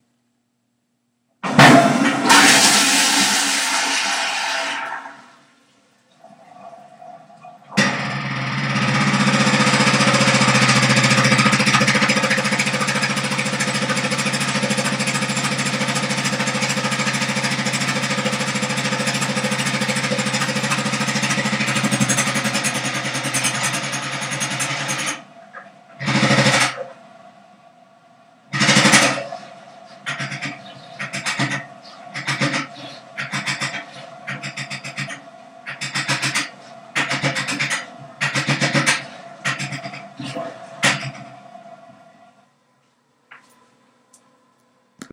bathroom handle got stuck have to record dat
ableton-live, field-recording, home-recording